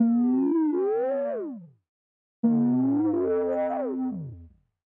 Dizzy sounding analog synth tone.